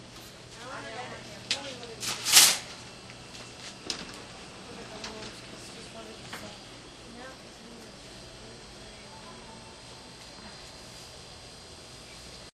philadelphia cvsbroadst
Inside the CVS on Broad St by the stadiums in Philadelphia recorded with DS-40 and edited in Wavosaur.
city, philadelphia